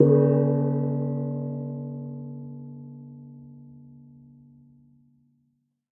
A large metal pot suspended by a string is hit on the bottom with a dampened mallet, producing a resonant tone with a slight buzzy quality. (Recorded with a stereo pair of AKG C414 XLII microphones)
clang, gong, hit, impact, metal, metallic, percussion, pot, steel